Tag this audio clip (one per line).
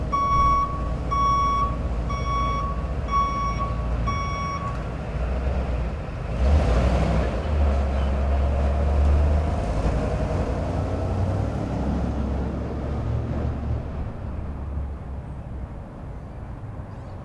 reverse
alarm
motor
drive
street
truck
engine
city
auto